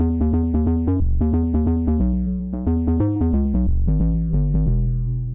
This a bass made by me with FL Studio in 2003 more or less.